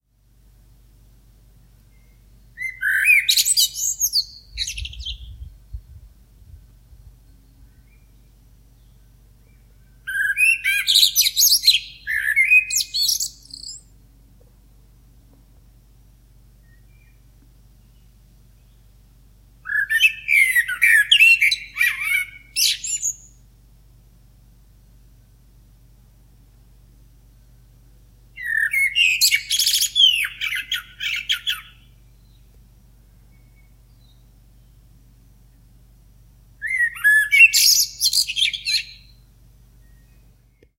The sound of a blackbird in the morning.
Bird, Germany, Morning